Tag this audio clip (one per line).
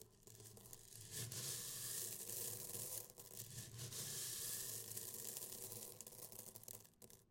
grains-bag
grains-drop